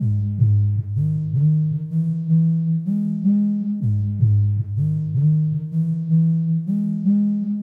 Synth loop created on the Minibrute, using arpeggiator mode with a touch of delay. Loops at 63bpm, this is 2 bars of the same loop. Enjoy!